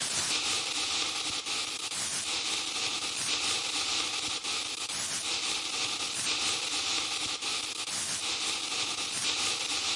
Noise FX short 1
Modular, NordLead, 3, synth